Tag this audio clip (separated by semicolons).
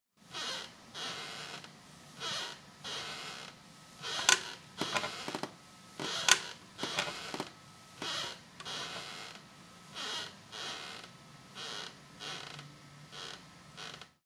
chair; squeek; crack; rocking